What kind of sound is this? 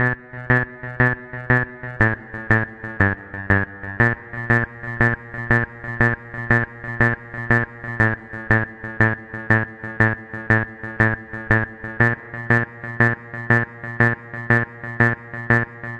Intro-Synth 2
This is the intro-Synth that you need, if you wanna create or mix the electro-track "Happy Siren". This Synth fits to the intro-bassline and the intro-synth_1!!!